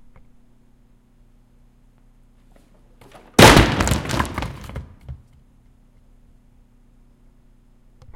Chairs Break, Crash, pieces move
Couple of chairs thrown and crashing, they break and the pieces fly away. Unedited, raw data.